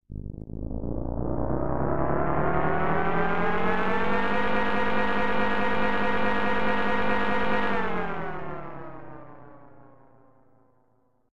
loopable usermade engine
this thing is available to loop as well if you know how to make audio looped
any perpose is useable whatsoever..
created and uh remixed by me
ableton, loop, pitched